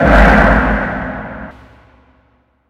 FM weapon sound
gun, missile, weapon